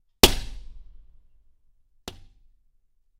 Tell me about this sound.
Windows being broken with various objects. Also includes scratching.
break, breaking-glass, indoor, window